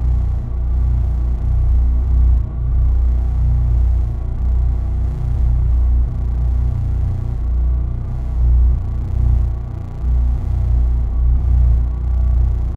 Granular drone
Sampled didge note (recorded with akg c1000s) processed in a custom granular engine in reaktor 4
didgeridoo, reaktor, granular